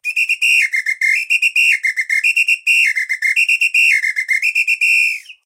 Different rhythmic patterns made by a samba whistle. Vivanco EM35, Marantz PMD 671, low frequences filtered.
pattern, percussion, rhythm, samba, whistle